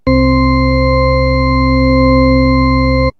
a c note on a hammond organ